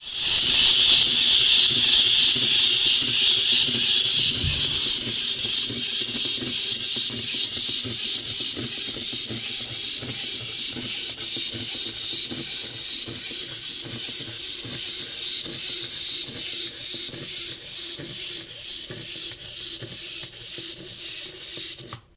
tortoise toy
toy tortoise walks across table. Hi pitched scrapey clockwok noise with clonking steps. thew whole thing slows down. Mini disk recording.
wind-up, clockwork